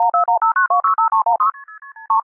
14159265316[star]b[star]a3 [MF, CCITT R1] loop extr-pitch-corr
Loopable piece of tone dialing sequence 1415926316*B*A3 with some unnecessary pitch correction and pitch bending together. Made with Audition.
loop, MF, phone, pitch-bend, pitch-correction, tone-dialing